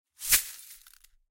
Pile of broken glass gathered in a felt cloth and shaken. Close miked with Rode NT-5s in X-Y configuration. Trimmed, DC removed, and normalized to -6 db.